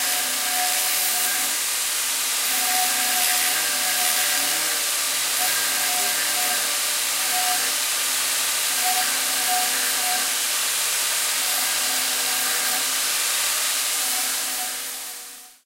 Pipe Cutter - Stereo
Cutting PVC pipes sound similar to drill sound